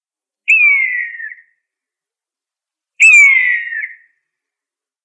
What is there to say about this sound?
A dual mono field-recording of a Common Buzzard's plaintive cry (Buteo buteo). Rode NTG-2 > FEL battery pre-amp > Zoom H2 line in.
birds, buzzard, field-recording